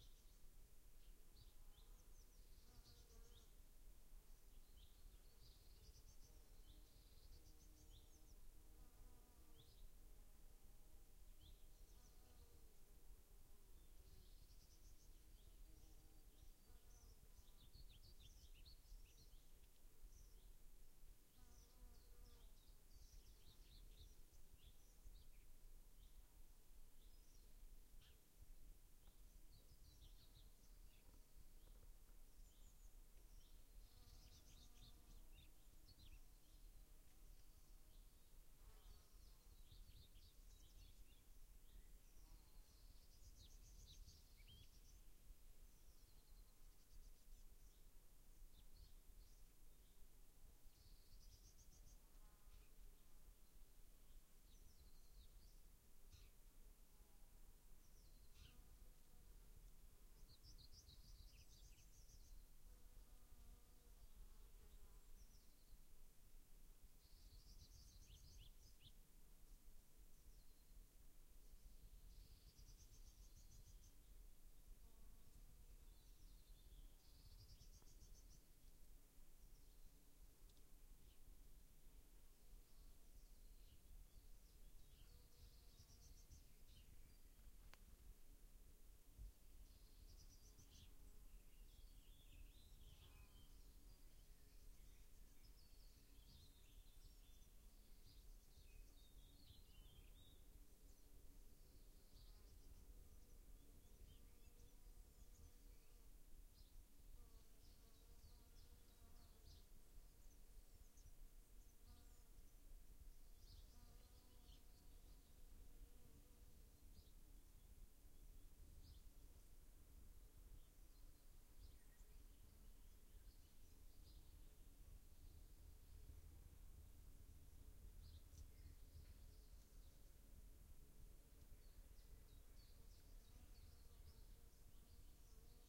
Peacefull nature sounds.
Field recording in a forrest field.
Birds and bees.